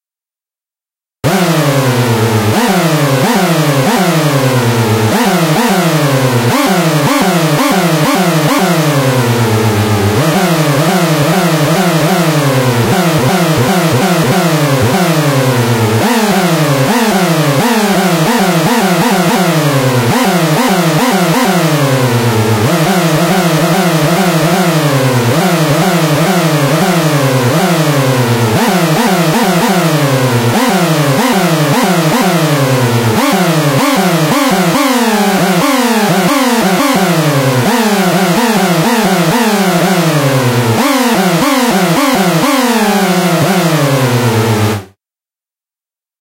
No juice sound.
blender, audio, sound, juice, empty